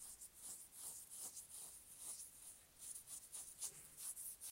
Scratching Skin
This is the sound your nails and skin makes together when scratching.